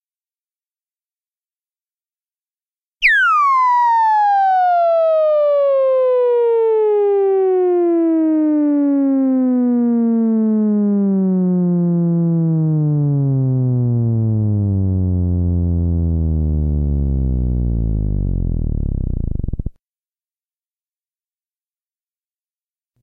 The term, "Pyew!" does not refer to a funky smell. It's a crude way of referring to the sound of a single shot of a ray gun or laser weapon. This is a very long sound effect that beginnings with the highest possible squealing "Pyew!" and very slowly descends to the lowest depths. What you can do with it is limited only to your imagination and creativity.
Every effort has been made to eliminate/reduce hum and distortion (unless intentionally noted).